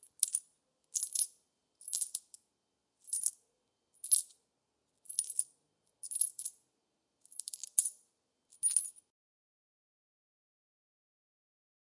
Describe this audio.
Sonido de monedas golpeándose entre sí
cash, coins, money